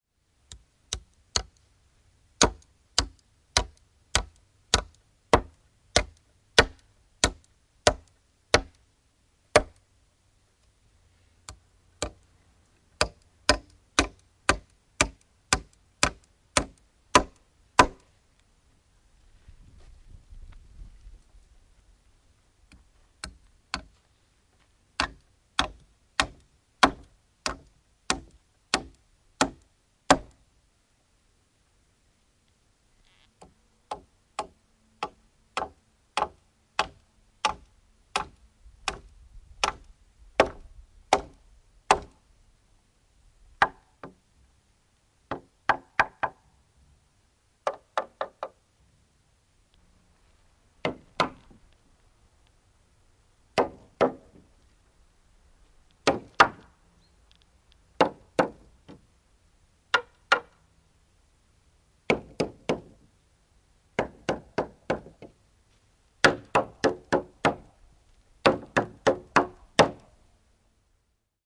Vasara, naulaus / Hammer, nailing, a dabbler at work, varying nailing, interior
Harrastelija naulaa, vaihtelevaa naulaamista. Sisä.
Paikka/Place: Suomi / Finland / Espoo, Laajalahti
Aika/Date: 1966
Field-Recording, Tehosteet, Yle, Hammer, Nail, Naulata, Vasara, Yleisradio, Finland, Naulaus, Finnish-Broadcasting-Company, Nailing, Soundfx, Suomi